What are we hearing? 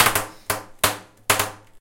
Queneau Scotch 04
dévidement d'une bande de scotch
effect, sfx, strange, sound-design, scotch, freaky, scrap